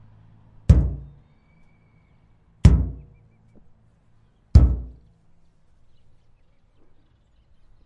Metal thud
This was recorded with an H6 Zoom recorder in Zita Park. I hit a metal dumpster to give a more hollow knocking sound on a metal surface almost like a container or a door.
hit, hollow, impact, metal, metallic, OWI, thud